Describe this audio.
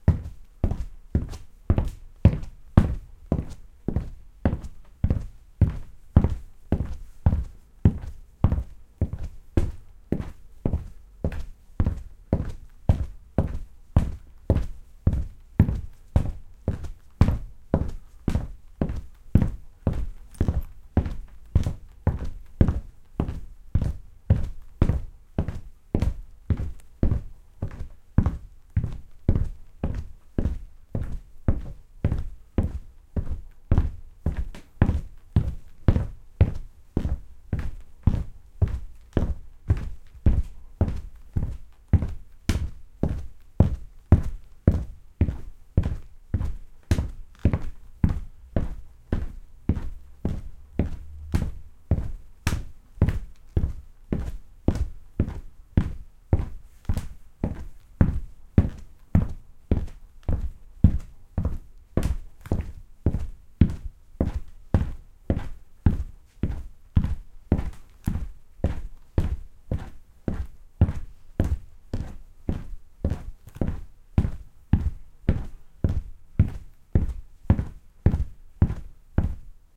Wood Footsteps
Me walking heavily in a newly constructed shed. It was pretty empty at the time of the recording, so there is a pretty overwhelming reverb which may complement the sound depending on the use case.
I would love to see any body of work that incorporates my sounds.